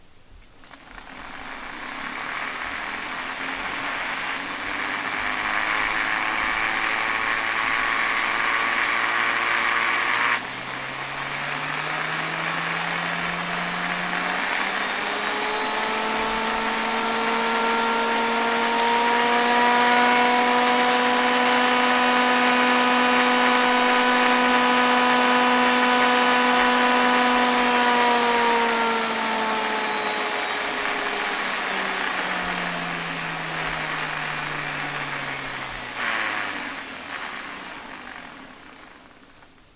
digitally slowed recording of a rotary tool being turned on and revved up and down through its several speeds